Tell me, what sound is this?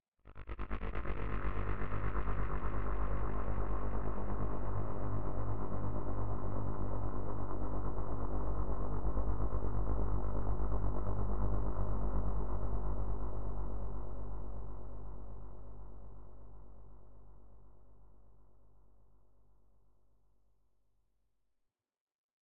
Space danger. Analog Modulation, recorded in Avid Protools.
alert, analog, danger, design, dream, fantasy, open, scifi, Space, space-echo